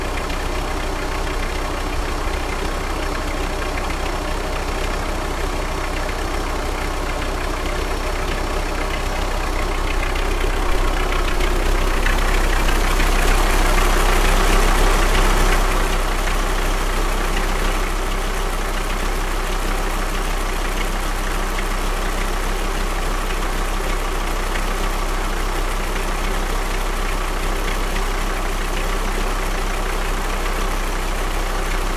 Recorded in Bangkok, Chiang Mai, KaPhangan, Thathon, Mae Salong ... with a microphone on minidisc